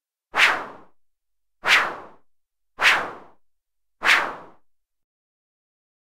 f Synth Whoosh 15
Swing stick whooshes whoosh swoosh